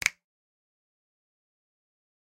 Real Snap 28
Some real snaps I recorded with an SM7B. Raw and fairly unedited. (Some gain compression used to boost the mid frequencies.) Great for layering on top of each other! -EG
finger, sample, percussion, simple, finger-snaps, snap-samples, snap, real-snap, snaps